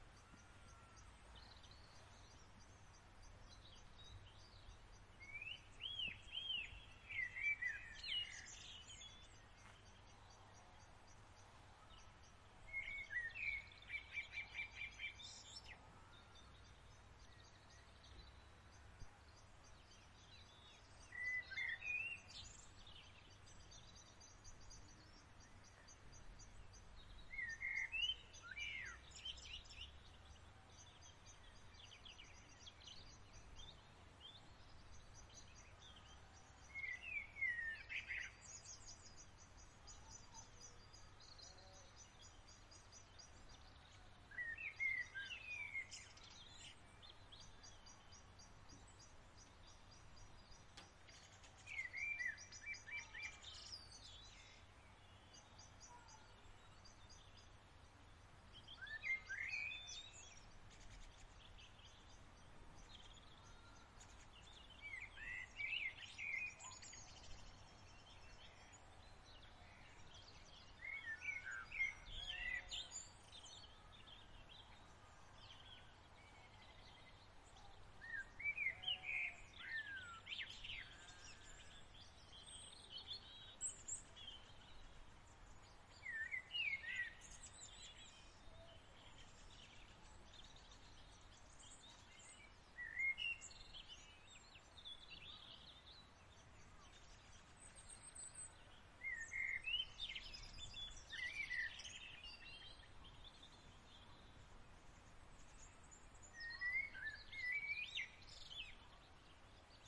Countryside Blackbird Rhos Wales April 8pm
This one has a very vocal Blackbird finishing off the evening (8pm). All recorded whilst staying in a converted barn in Rhos, Wales. Recorded on my Zoom H4N, there maybe some wind noise in places and maybe a very high flying jet, but mostly it is just the sound of nature. There is a nearby stream in the background too. These are the original uncompressed untreated files.
Hope it is useful to someone